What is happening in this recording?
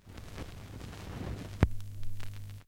needle-up-2
Sound of a needle coming off of a 33 rpm record on a technics 1200 mk2